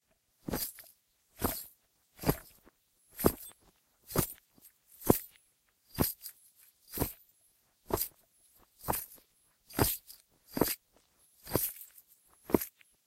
Boot & spurs
I wanted a cool 'showdown' boot with spurs sound, but because I couldn't find any, I made my own!
boots, cowboy, jangle, jingle, showdown, spur, spurred, spurs, western